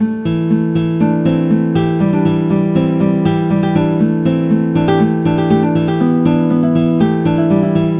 a loopable acoustic guitar riff :)
8 bars 120bpm
loop, guitar, 120bpm, acoustic